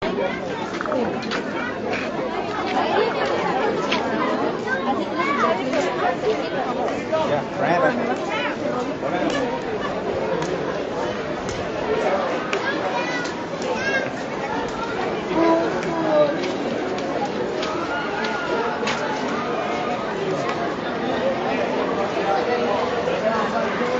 Lots of chatter and hustling about at a theme park entrance.
busy, crowd